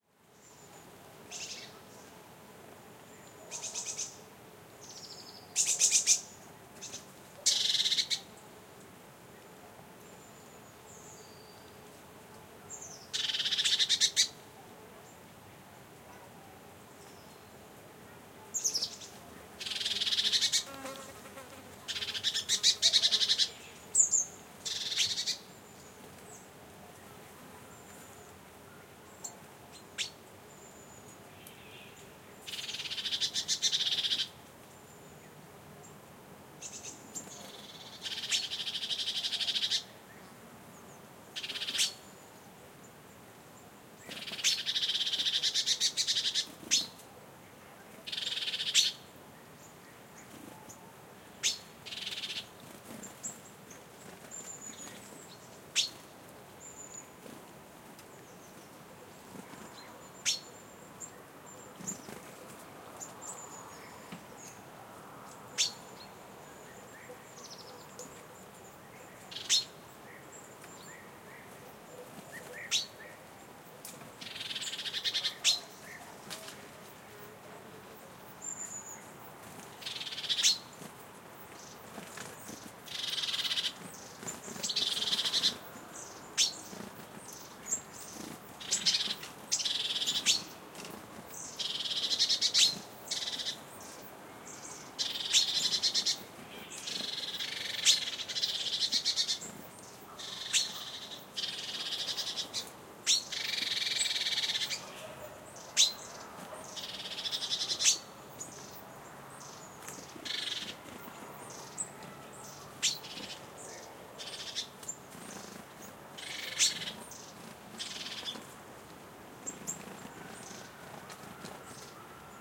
The little birds seemed both angry and intrigued at the sight of the tripod, the blimp containing an Audiotechnica BP4025, and the Sound Devices Mixpre-3 that recorded the encounter. Registered near Aceña de la Borrega, Cáceres Province (Extremadura, Spain)